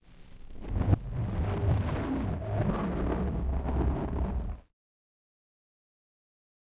mp bullroarer
Fluid low frequency sequence, processed.
processed, rumble, low-frequency, fuid